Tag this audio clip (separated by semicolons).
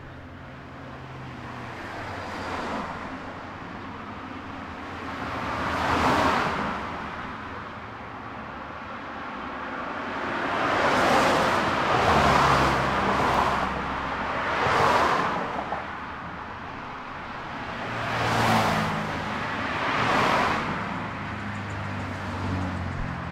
car; cars; driving; engine; motor; passing; road; roadway; street